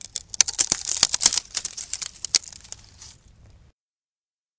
dry branches cracking
recording of cracking branches
branches, crack, cracking, dry, hi, nature, pitch, wood